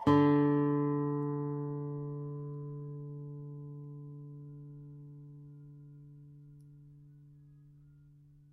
D4 (sounding D3) played on a classical Spanish guitar, mf.